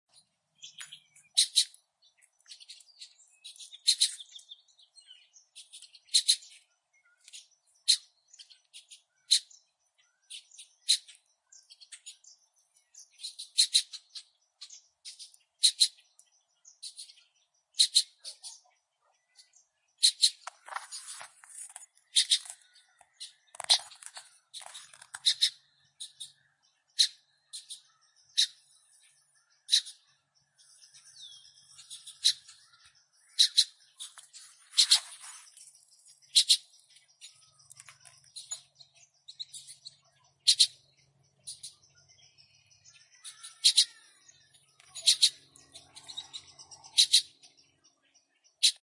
Belmar BlackBird Fledglings
Two Black Bird fledglings talking to each other. I was only 4 feet from the closest one!
ambiance, ambient, nature, birds, field-recording, birdsong